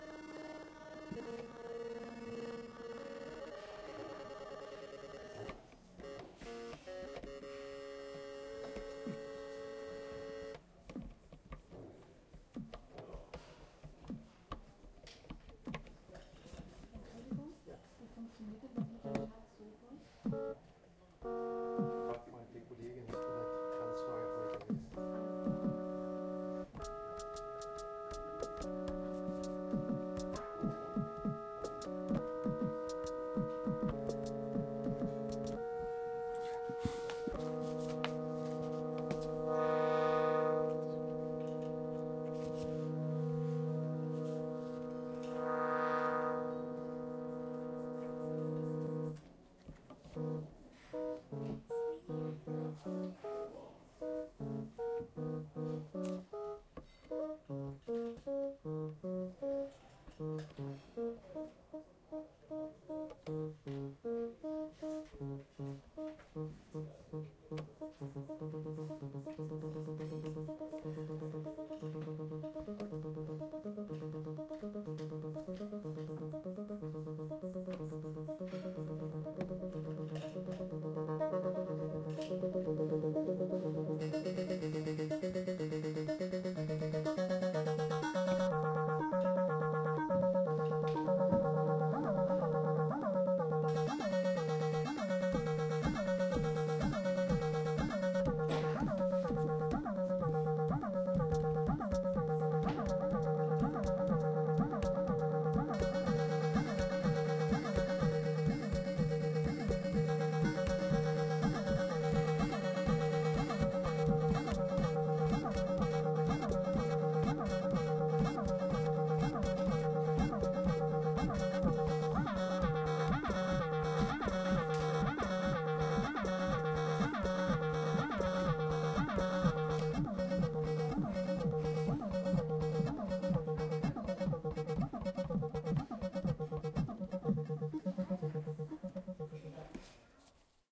Testing Dato DUO
Testing the electronic music instrument Dato DUO in the Musikinstrumenten Museum (Berlin). Recorded on July 17, 2018, with a Zoom H1 Handy Recorder.
electronic, improvisation, techno, dato-duo